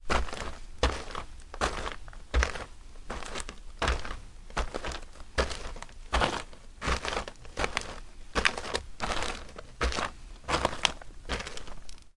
SFX Steps Gravel Walk
Short recording of footsteps on gravel, recorded in the Foley studio with Earthworks QTC30 microphones.